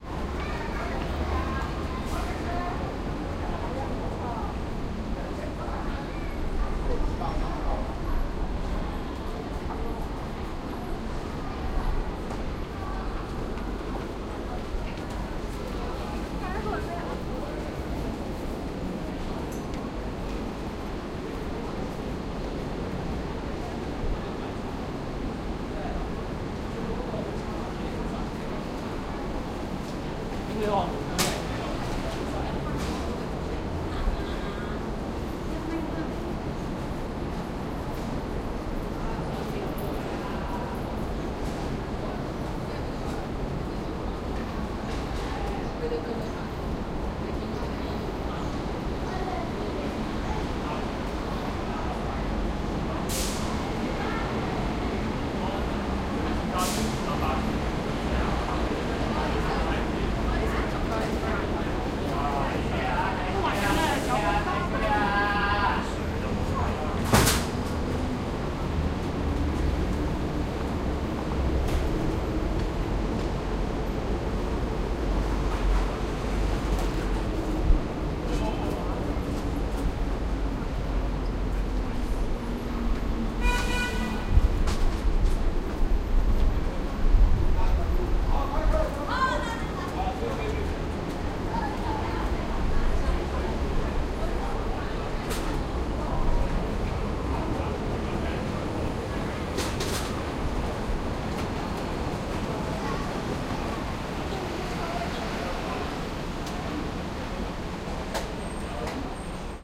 VOC 150325-0974-1 HK citywalk
City walk in Hong-Kong.
I recorded this audio file while I was walking through Hong-Kong city. You can hear some typical sounds and noise from this town, like traffic, people talking, walking, etc…
Recorded in March 2015, with an Olympus LS-100 (internal microphones).
street, vehicles, HongKong, horn, soundscape, traffic, Hong-Kong, noise, field-recording, ambience, bus, sounds, walk, mall, cars, people, talking, city